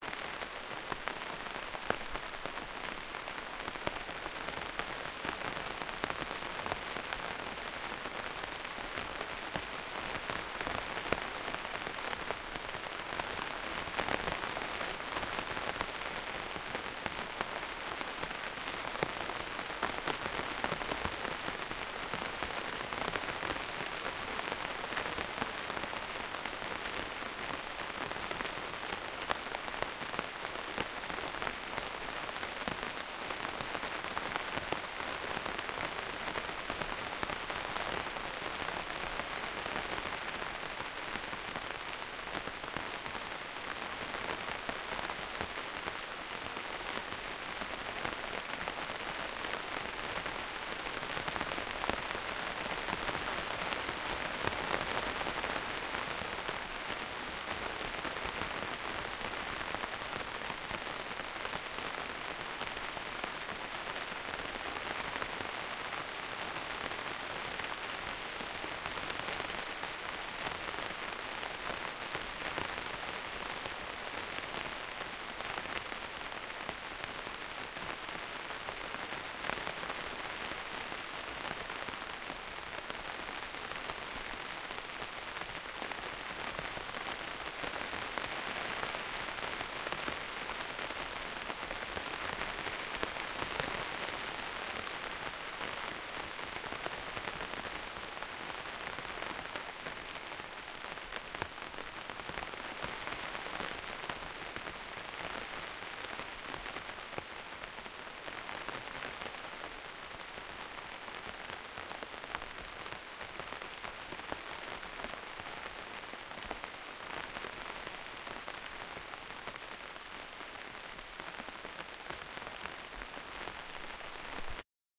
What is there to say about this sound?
rain inside the greenhouse close perspective to plastic surface
nature, plastic, rain, storm, thunder-storm, thunderstorm, weather